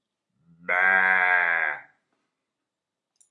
Bleating sheep (once)
nature, animal